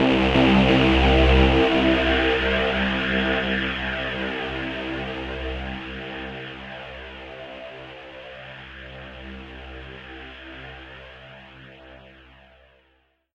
Big full pad sound. Nice filtering. All done on my Virus TI. Sequencing done within Cubase 5, audio editing within Wavelab 6.